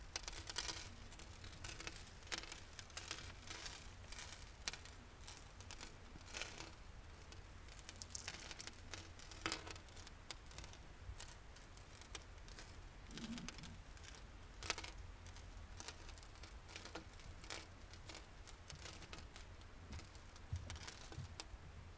sand pour on wood FF667
sand, sand pour, sand pour on wood, wood
wood, pour, sand